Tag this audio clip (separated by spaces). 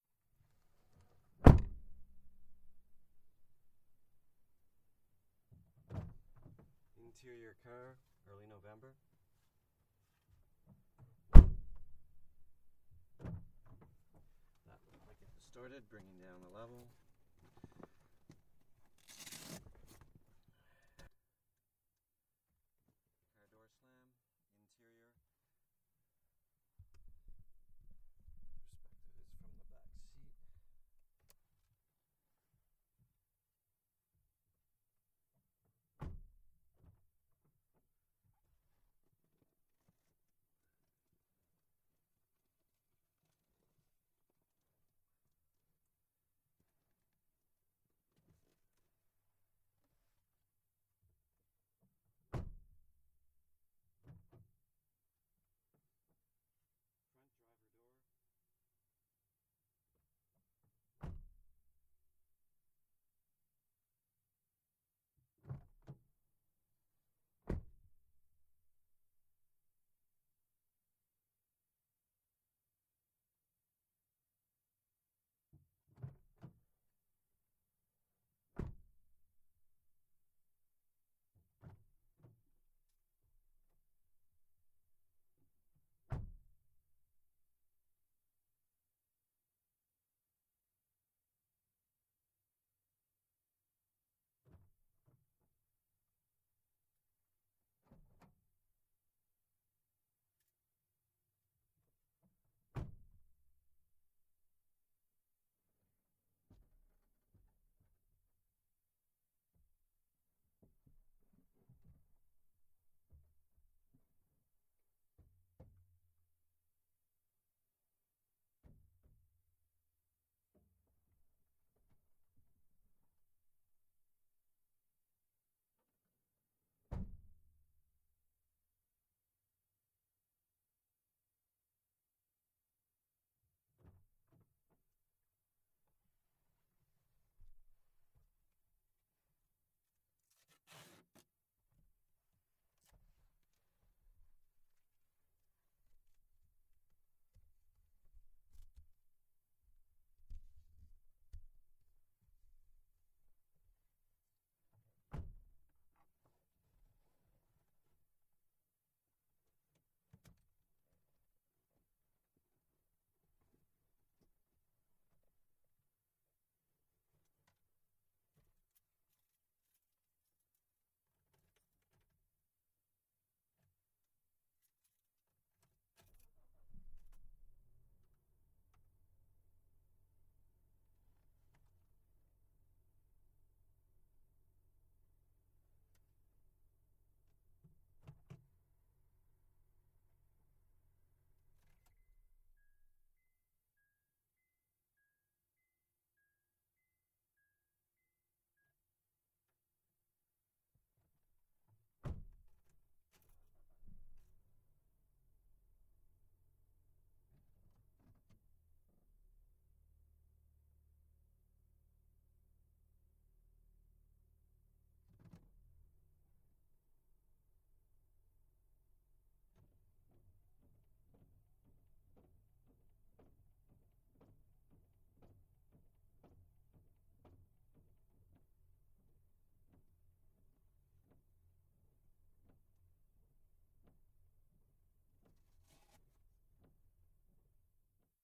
Bformat door open interior ambisonic close car